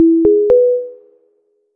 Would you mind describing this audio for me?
intercom in
Three ascending tones. Made in Garageband. Used for a production of Sideways Stories from Wayside School for the "video intercom", this is the sound it made when it came on.
3, ascending, intercom, three, tone, tones